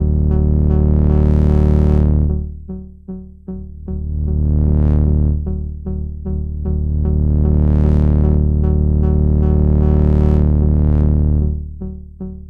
Part of assortment of sounds made with my modular synth and effects.
synth, maths, oscillator, analog